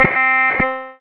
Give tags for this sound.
multisample lead bass ppg

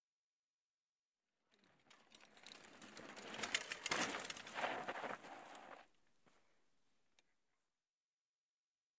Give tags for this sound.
bicycle pedaling terrestrial park approach click wheel ride downhill bike street chain freewheel rider whirr jump

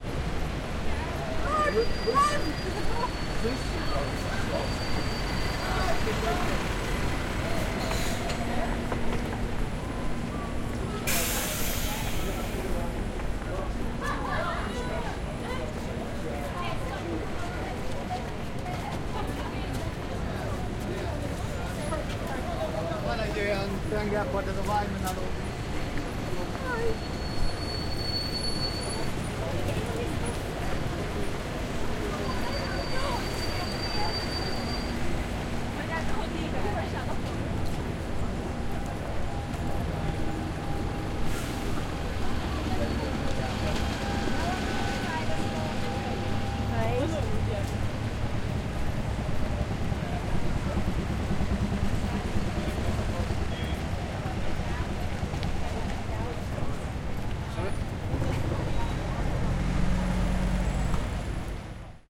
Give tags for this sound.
people traffic talking busy-street

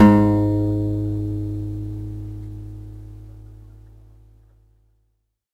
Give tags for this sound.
multisample acoustic guitar

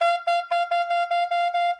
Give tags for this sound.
soprano-sax saxophone loop melody sax soprano

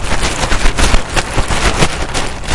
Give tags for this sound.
sonic-snap Escola-Basica-Gualtar

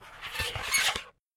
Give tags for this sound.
Quick Screech Squeak

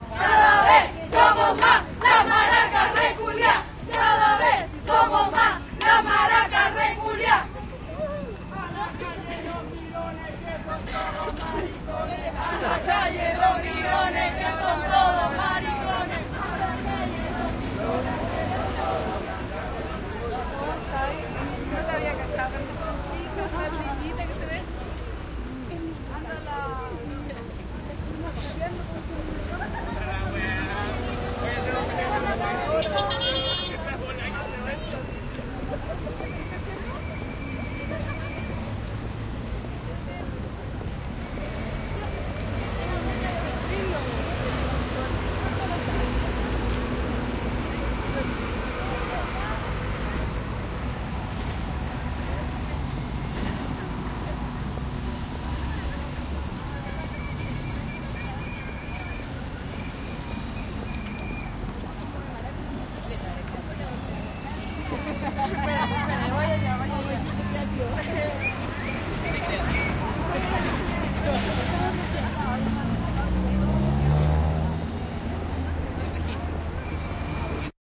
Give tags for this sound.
calle protesta crowd protest mapocho putas chile gritos silvestri maracas estacion leonor santiago marcha street

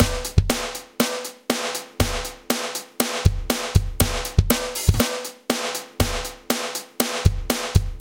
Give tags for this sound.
drum industrial loops raw rock

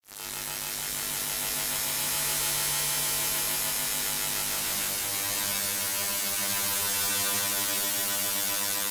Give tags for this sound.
buzz buzzing digital electronic lo-fi noise VST

